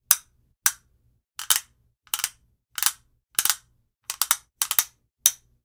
wooden spoons
A few assorted clicks from a wooden spoon instrument.
percussion, click